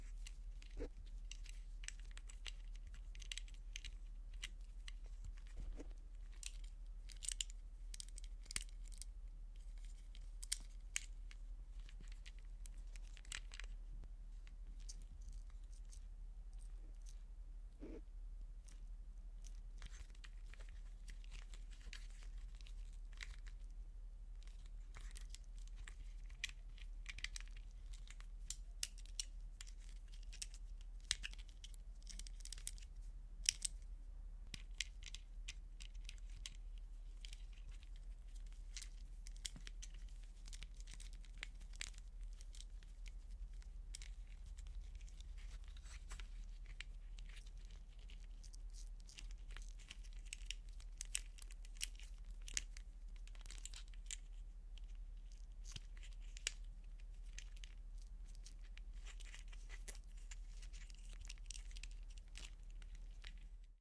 Binoculars and dangling strap - Foley - Handling and moving
Handling and using a vintage binoculars with their leather strap and metal clips dangling. Recorded with Audio-Technica boom mic on Tascam DR60dM2
binoculars, clips, dangling, effects, foley, handle, handling, hanging, heavy, hit, leather, metal, movement, moving, object, pop, sfx, strap